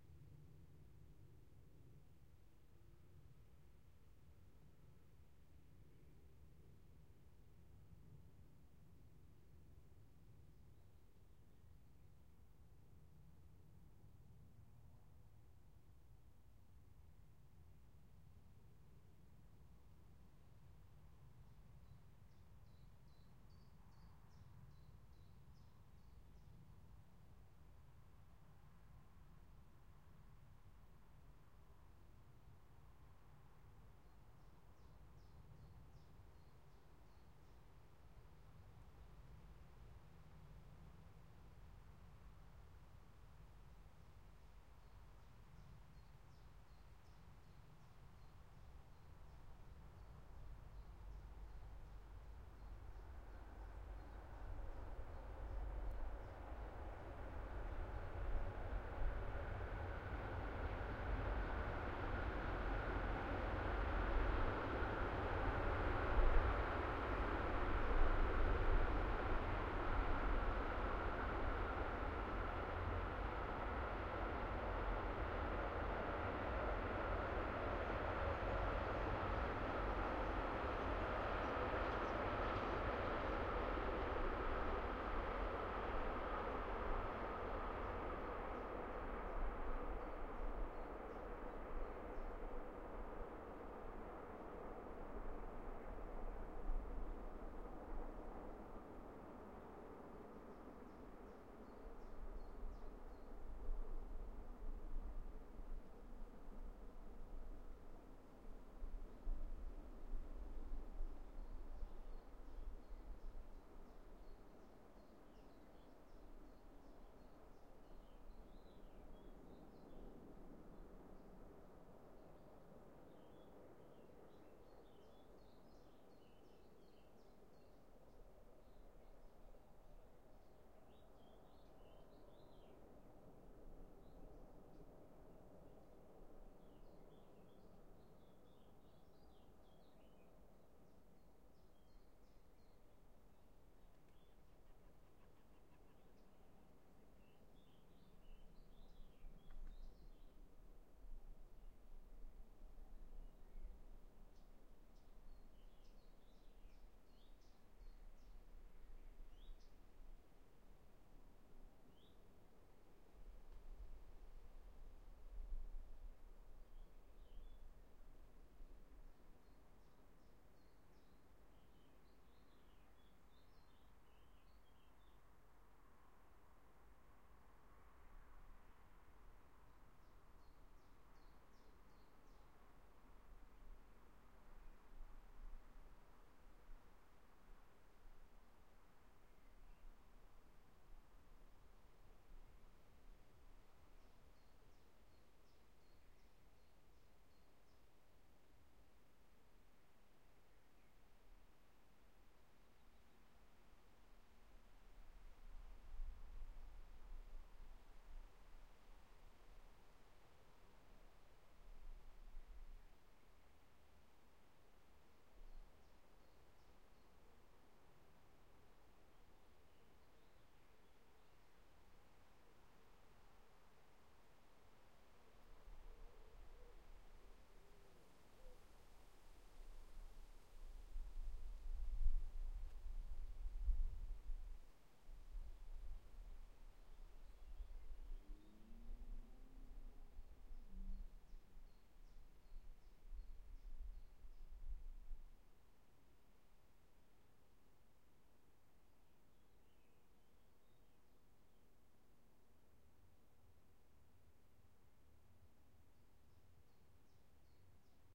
Across my fields i hear a train coming 2019 05 12
I recorded this on a sunday around 2pm. I put up the olympus LS100 recorder pointing south, against the railroad crossing my land.I can't remember when the train comes, but was in luck. The train humored me, driving past me after 3 minutes